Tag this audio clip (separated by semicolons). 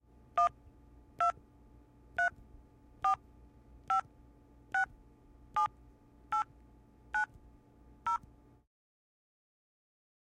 0-9; cell; dialing; in-ear; mobile; phone